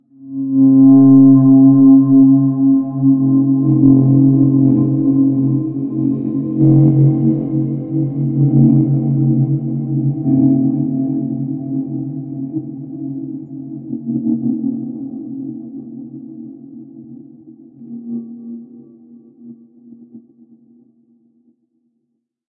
Samurai Jugular - 12
A samurai at your jugular! Weird sound effects I made that you can have, too.
dilation, effect, sci-fi, high-pitched, sfx, experimental, trippy, time, sound, spacey, sweetener